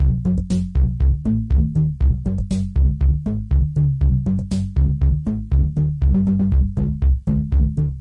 Lofi recording, analog Yamaha MR10 Drum Machine raw beat with virtual analog synth. 80's classic drum machine. Grimey, distorted.
Silly, Lofi, Lotek, Retro, Beat